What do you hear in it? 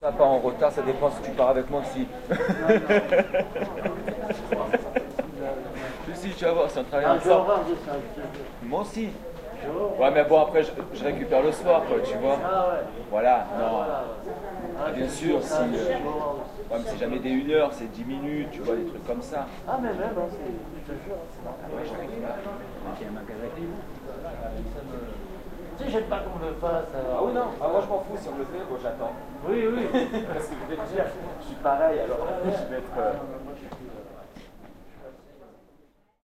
Laughter and voices in a French street. Good stereo spread. Minidisc recording September 2006.